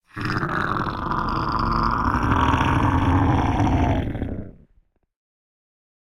Massive growl
Recorded myself with a Zoom H4N imitating a giant monster. Processed in Ableton Live 9 with Warping, Frequency shifter, multi band compression and eq.
Growl, Snarl